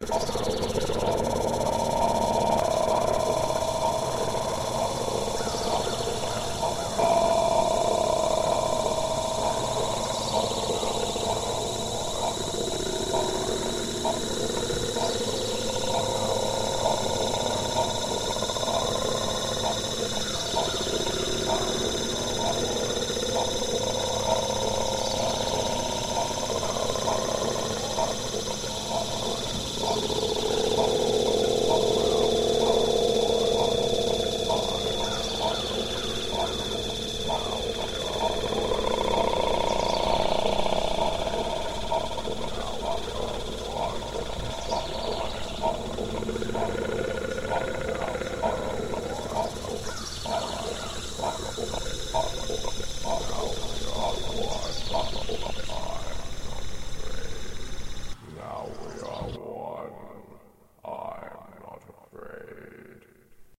Vocal Experiment & Bird Sounds #2
Two rather strange tracks featuring looped and modified vocals (mine) and bird sounds. All sounds recorded and processed by myself.
abstract, ambient, bird, creepy, drone, effect, experimental, sounds, strange, vocal, weird